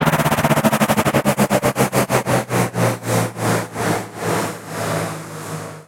Sweep down - mod. 1
sweeper down cut heavy processed and layered
source file:
digital, experimental, freaky, fx, glitch, lo-fi, loud, noise, sound-design, sound-effect, strange, weird